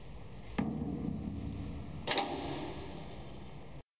Heavy metal door closed with latch in the old jail of "leMurate" in Firenze.Recorded by Zoom HN4
bolt, carcere, door, firenze, latch, lemurate, oldjail, porta, prigione, prison, vecchiaprigione